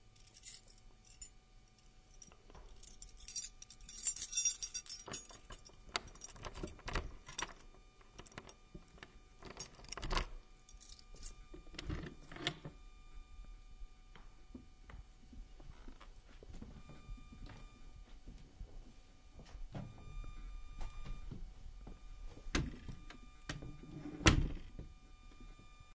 There is a high pitch that I don't know how it popped up. Recorded with a Sony PCM-D50, Beachtek DXA-6VU XLR adapter, Blue XLR cable, and a AT8035 shotgun. I did get generic splitter and was handholding all gear.